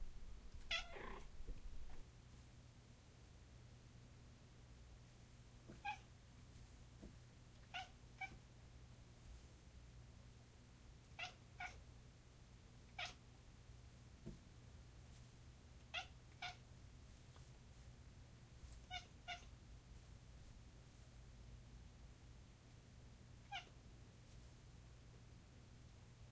This is my cat, Echo, doing the bird chirp noise as she looks outside. This was recorded using a Zoom H2n